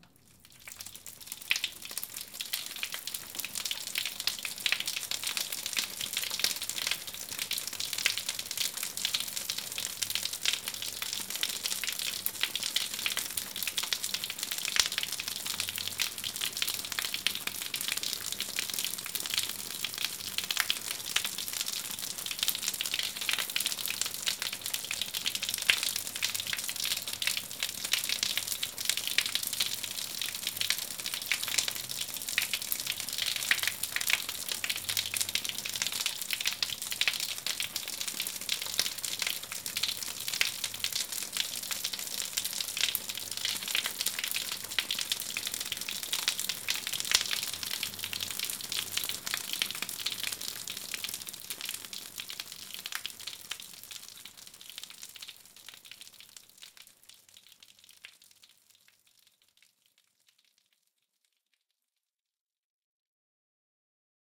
Water form shower/Agua de la regadera